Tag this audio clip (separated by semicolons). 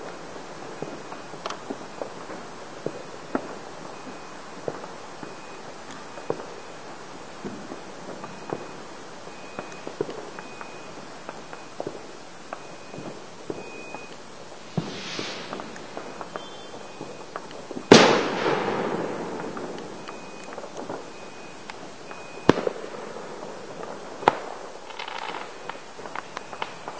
ambience; bang; boom; firework; fireworks; fireworks-night; guy-fawkes-night